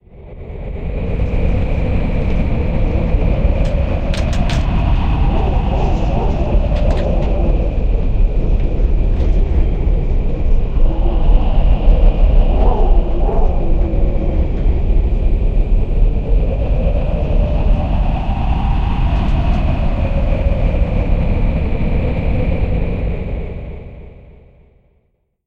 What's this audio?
Howling Wind
This is a sound I created to replicate what it sounds like to be inside on a very cold windy night ;)
Recorded with Sony HDR-PJ260V then edited with Audacity
cold, gale, window, howl, relaxing, rattle, storm, wind, blow, weather, winter, gust, chill, windy, sound, gusts, blowing, howling